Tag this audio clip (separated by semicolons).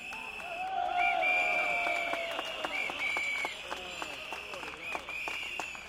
demonstration; labour; whistle